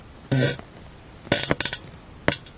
fart poot gas flatulence flatulation explosion noise weird